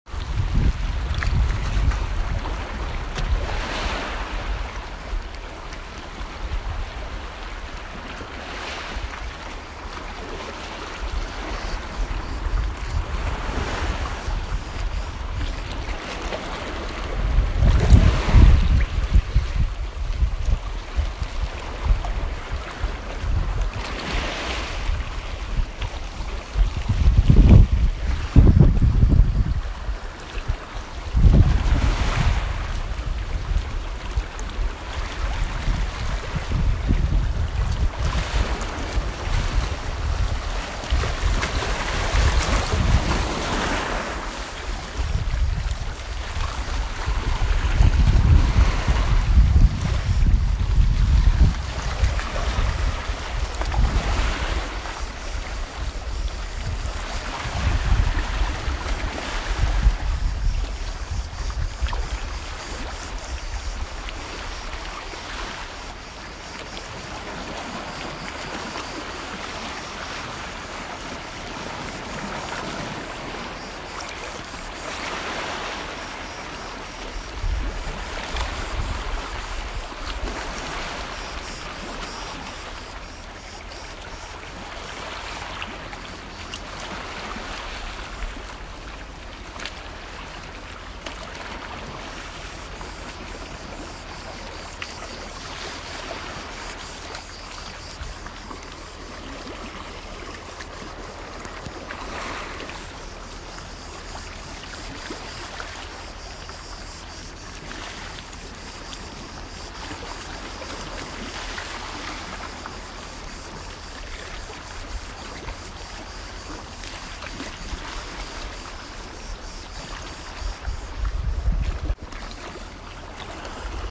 Sea against shore in secret cove - crete
Sound of sea against shore in secret cove in Crete
beach, coast, Field-recording, lapping, ocean, sea, sea-shore, seashore, seaside, shore, surf, water, wave, waves